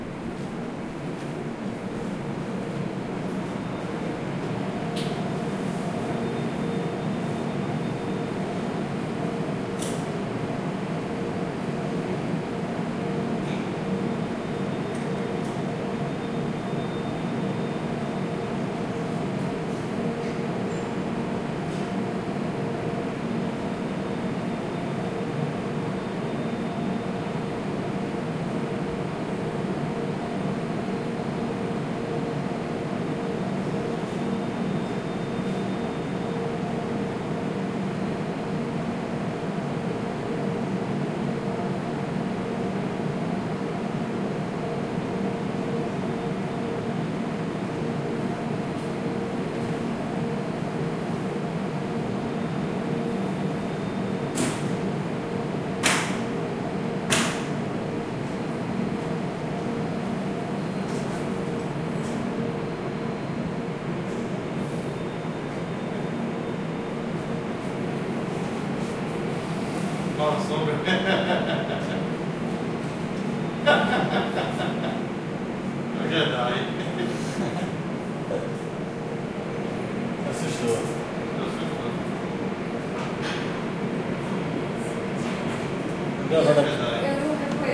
Indust blower laughing crackles
In a Margarine Fabric, using H4n.
laughing, blower